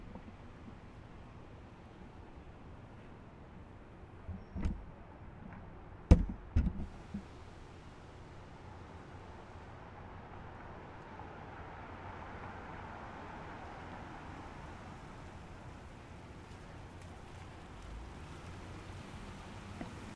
Car Approach
A car drives up and stops
car, drive, street